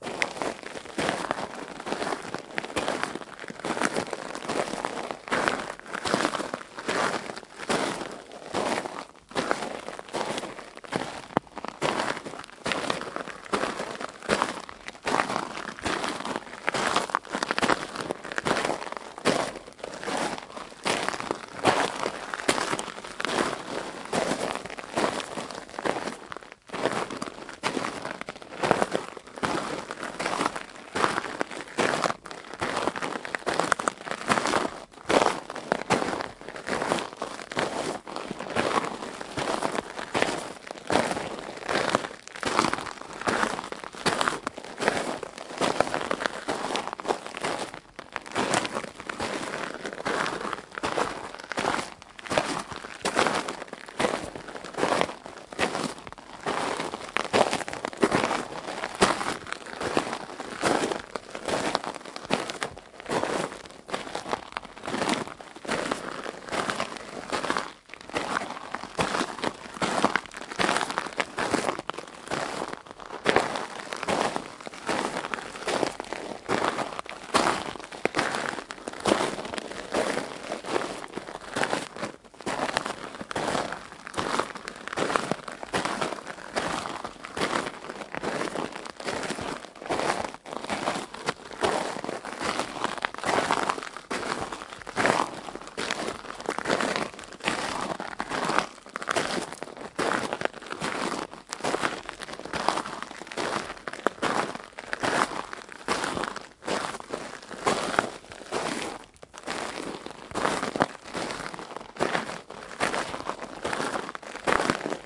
A stereo field-recording of walking on small sized ( 6mm ) granite gravel. Recorded at night so there are no birds present.Loopable. Zoom H2 front on-board mics.